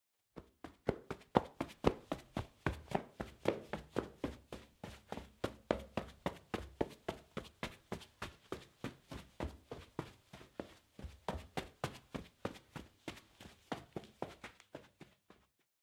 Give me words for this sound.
11-Man fast walking carpet
Man fast walking on carpet
CZ, Pansk, footsteps, step, man, carpet, fast, Czech, Panska, walking, footstep, walk, steps